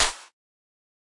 made in zynaddsubfx processed in audacity
house, clap